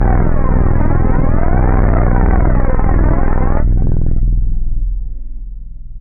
THE REAL VIRUS 12 - FUZZBAZZPHLANGE -E0
This is a fuzzy bass sound with some flanging. All done on my Virus TI. Sequencing done within Cubase 5, audio editing within Wavelab 6.
bass, flange, lead, multisample